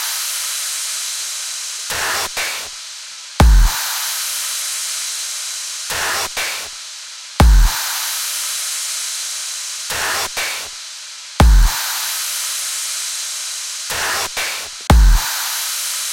Grinding Beat 4
120 BPM Highly Processed Drum Beats
beats, effected, processed